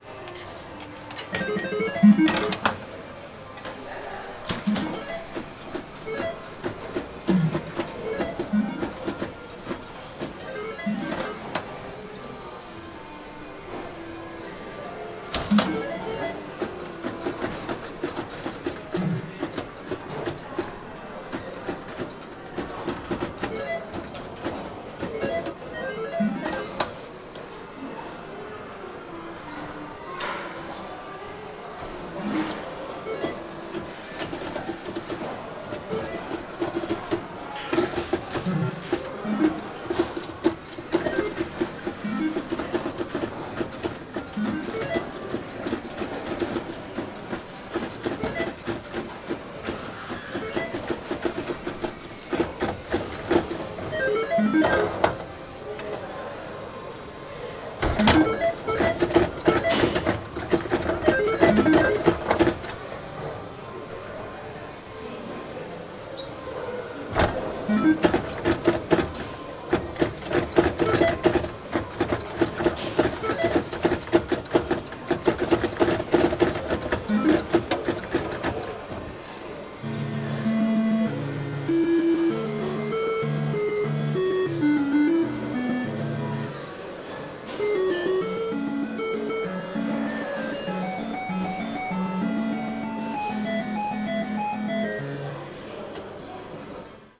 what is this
Soviet Arcade - Circus Pinball Game
Soviet arcade pinball game sounds. Some music in the end. A lot of background noise.
This recording was made in Moscow, Russia as a part of my project for Location Sound module in Leeds Beckett University.
soviet, arcade, game, pinball